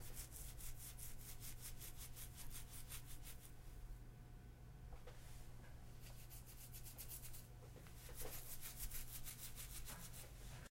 19 -Sonido de rascarse
Foley, rascarse, sonido